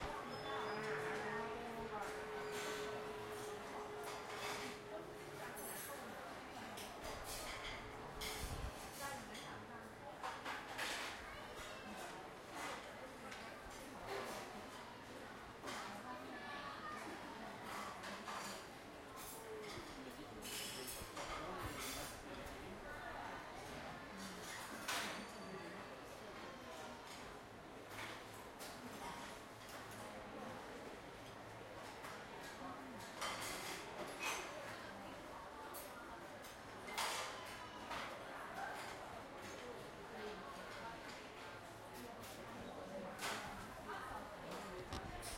Bangkok Restuarant Dishes Distant Road Noise
Zoom H1 sitting in a restuarant in Central World - noticed a lot of dishes noise so started recording. Back ground drone of city noise - some light conversations.
Ambiance, Atmosphere, Bangkok, Dishes